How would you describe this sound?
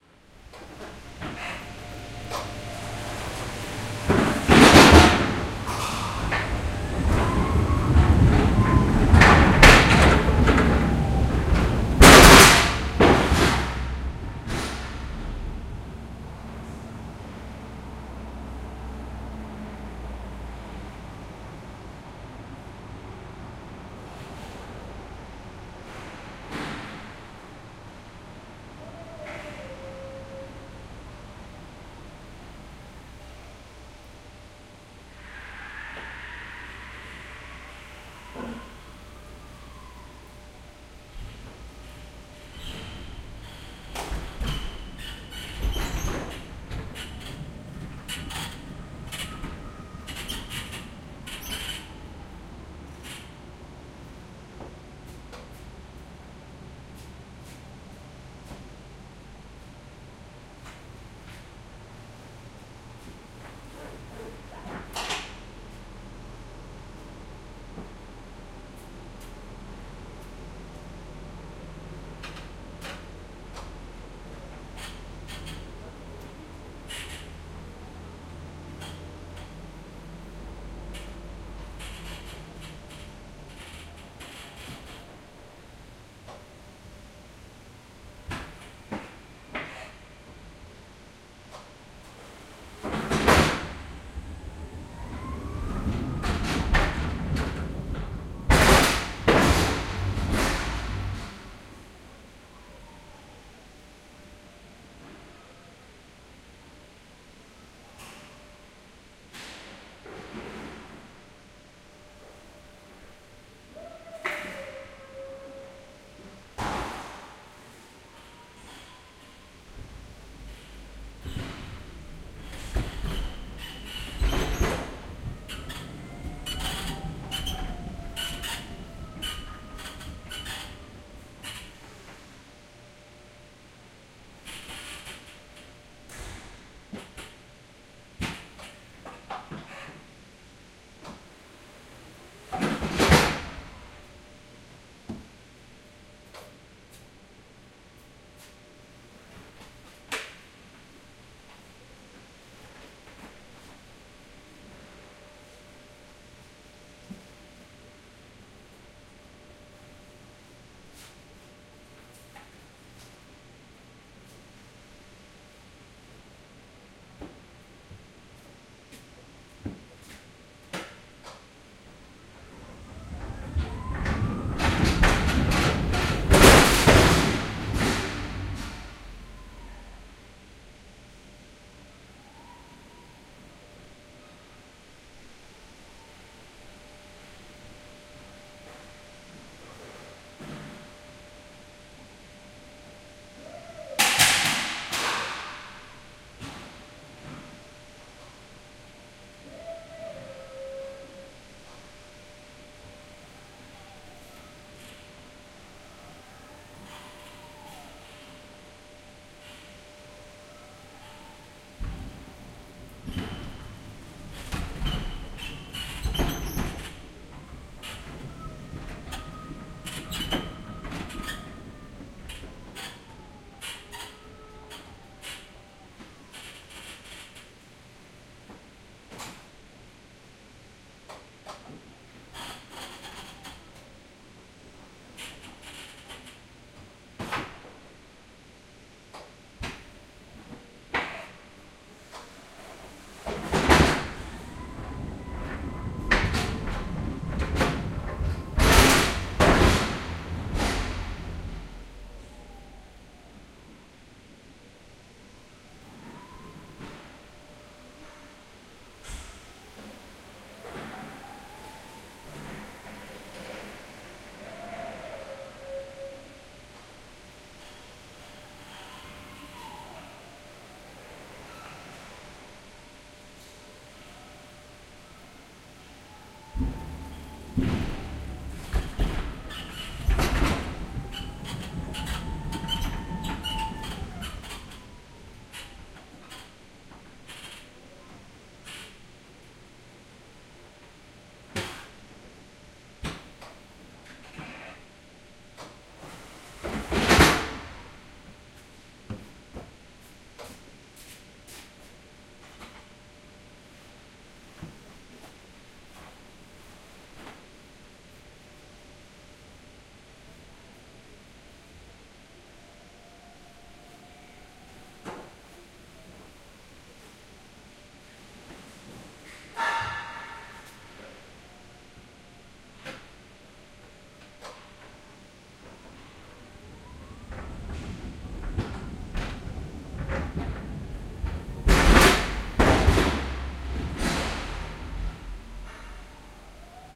110809-load from the caravan perspective
09.08.2011: tenth day of ethnographic project about truck drivers culture. Oure in Danemark. Loading hall with loading ramp. Sounds of loading redcurrant. Cracking, creaking, rumbling.